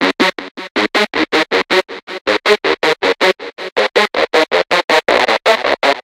This is an emo type sound,at 160bpm
emo, synth, dance